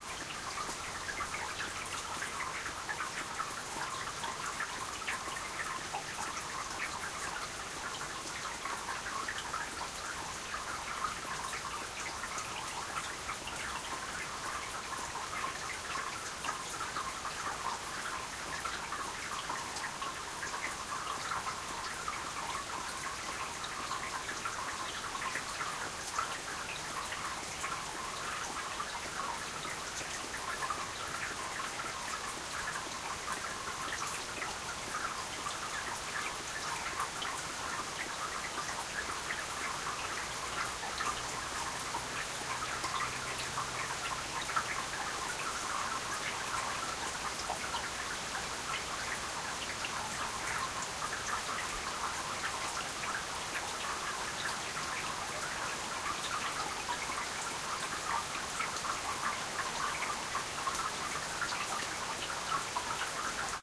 Summer rain recorded in July, Norway. Tascam DR-100.
drip, drop, field-recording, rain, weather